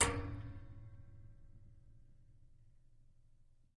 Scrape ff-10 014
recordings of a home made instrument of David Bithells called Sun Ra, recordings by Ali Momeni. Instrument is made of metal springs extending from a large calabash shell; recordings made with a pair of earthworks mics, and a number K&K; contact microphones, mixed down to stereo. Dynamics are indicated by pp (soft) to ff (loud); name indicates action recorded.
acoustic, metalic, scrape, spring, wood